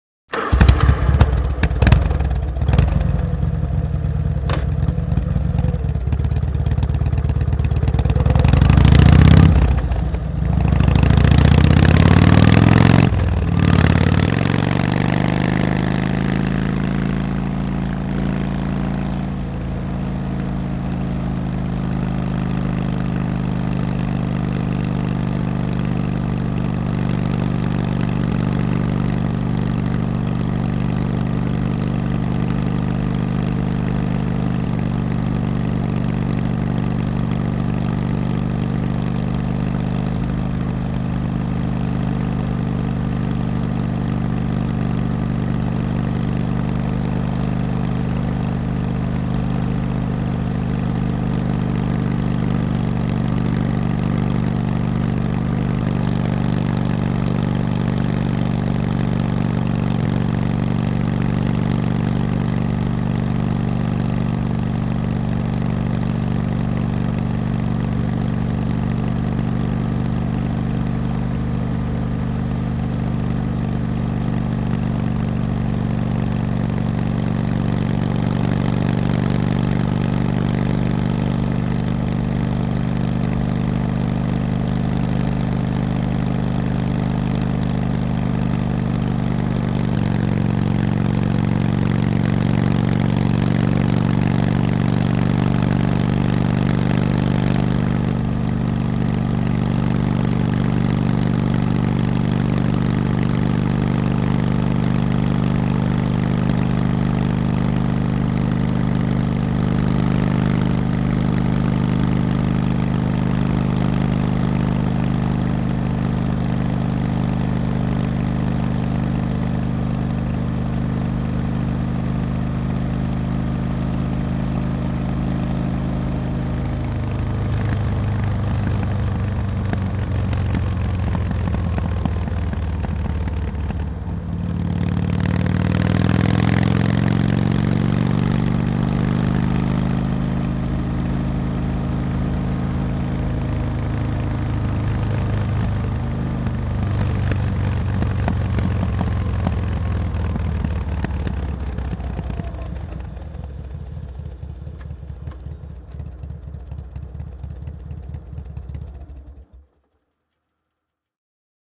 Moottoripyörä, ajoa asfaltilla / A motorbike, start, steady riding on asphalt, stopping, switch off, Harley Davidson, V8, 1340 cm3, a 1986 model
Harley Davidson, V8, 1340 cm3, vm 1986, harrikka. Käynnistys ja ajoa mukana asfaltilla tasaisella nopeudella, pysähdys ja moottori sammuu.
Paikka/Place: Suomi / Finland / Lohja
Aika/Date: 31.07.1991
Yle, Soundfx, Suomi, Finland, Field-Recording, Motorbikes, Motorcycling, Finnish-Broadcasting-Company, Tehosteet, Yleisradio